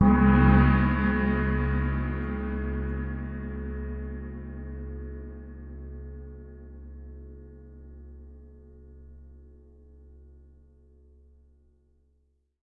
Crash Gong 01
Cymbal recorded with Rode NT 5 Mics in the Studio. Editing with REAPER.
cymbal, one-shot, special, paiste, drum, sabian, sample, percussion, beat, crash, bell, sound, bowed, meinl